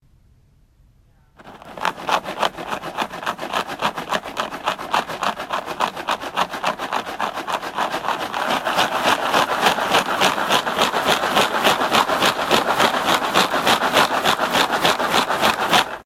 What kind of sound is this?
Cereal Shake
Me shaking a box of cereal. Recorded on my iPhone8.
field-recording, ambiance